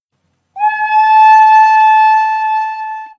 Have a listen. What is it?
N continu tonique
son seul
fondue en fermeture
quand j'ai effectué l'exercice; mon niveau correspondait à ce que j'écris ; j'ai fait ce que j'ai pu et je vous l'envoie tel quel ; de même pour les autres sons).
train, bruit, Essai, dun, de, avec, une, flte